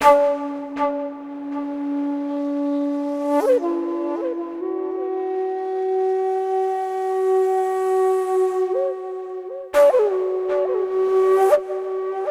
Flute created with Simple Flute from Fluffy Audio a free player for KONTAKT (NI)
flute, kontakt, sample, sound